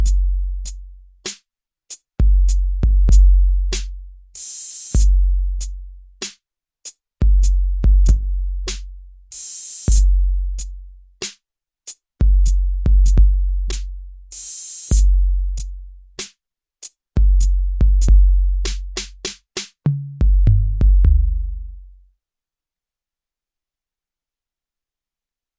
95 bpm created on an Roland TD-4 TR-808 sounds Hip hop
tr-808, hip-hop, 95, drum, 95-bpm, 808